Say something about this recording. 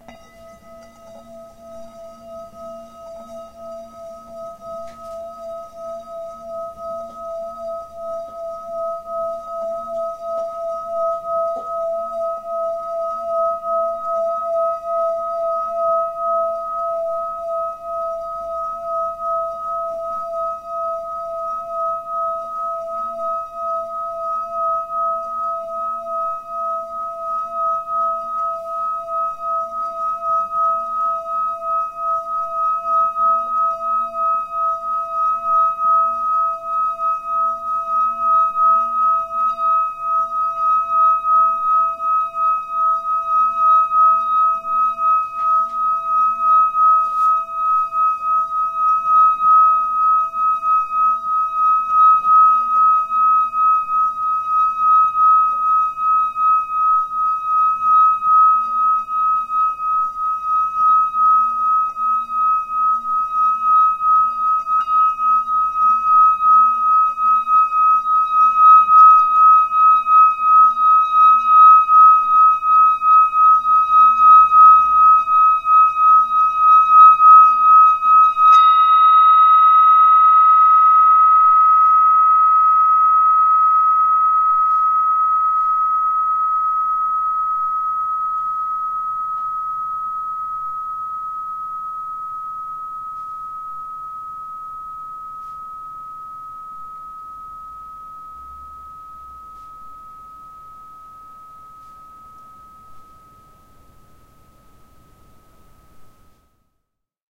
singing-bowl-wood02
Another recording of the same singing bowl played with the wood part of the mallet. This is supposed to match the third chakra, also known as the throat chakra.
bowl, tibetan